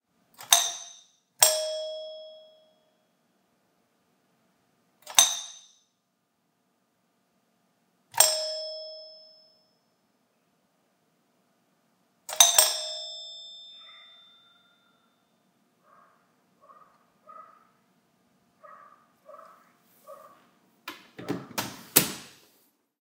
Ring NYC apartment doorbell, neighbor dog barks
Typical NYC apartment doorbell ringing. The neighbor's dog starts barking at the end of the take